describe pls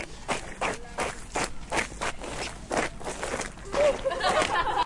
This is a sonic snap of shoes scuffling recorded by Laura and Amy at Humphry Davy School Penzance